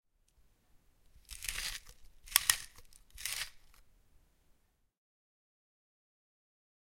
a plastic pepper mill